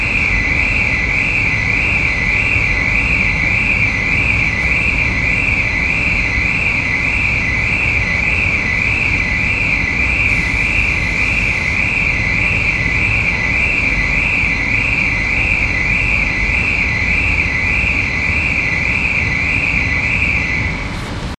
capemay ferrycaralarm
Some idiot's motion sensing car alarm on the Cape May-Lewes Ferry heading south recorded with DS-40 and edited in Wavosaur.